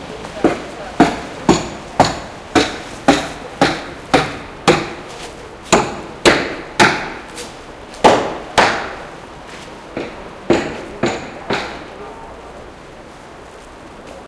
bangs in a construction (or rather destruction) site / golpetazos de machota en una obra - esto es una traducción libre y lo demás son cuentos